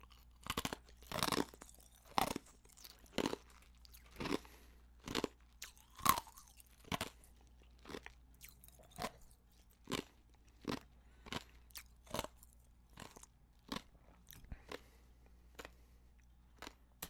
FOLEY Chew Ice 2
Ice being chewed. Could also be used for potato chips, crackers, or anything crunchy and brittle.
Recorded with an Audio-Technica AT3035 through an M Box. Close perspective, with mouth both opened and closed. Some breathing through the nose (I was running out of breath!).
Make sure you edit out the sound of me hitting the space bar at the end if you're using the whole file :p
chips, foley, chew, crunch, ice